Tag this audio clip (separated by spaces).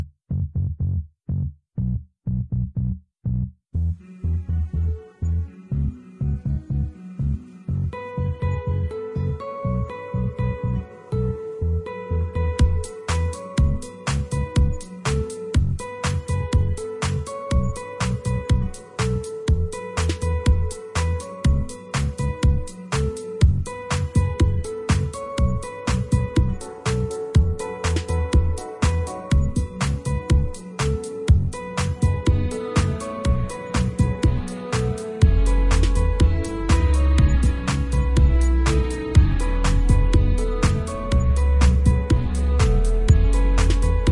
just-a-bit slow speed-up beat up down